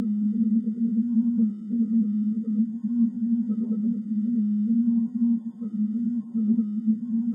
Morse-Sine
tone, wavy, morse, sine